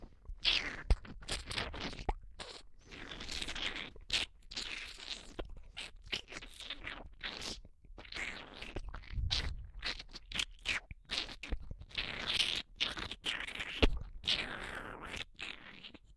Wet Squishy Mouth Sounds 01
This is a recording of me making a sucking sound, then moving saliva around. It's meant to be disturbing. If it makes you feel uncomfortable, it's doing it's job.
Saliva, Mouth, Mushy